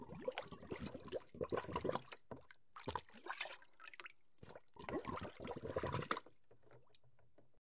Immersing underwater and bubbling.